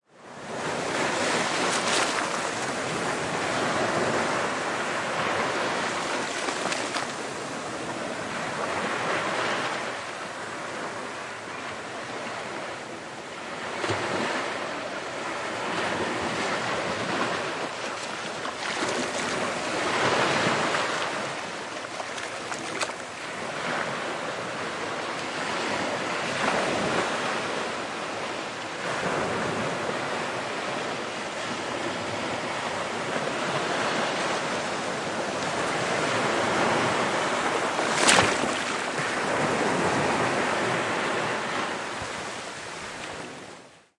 Pattaya beach recorded near the waves and foams with Rode iXY.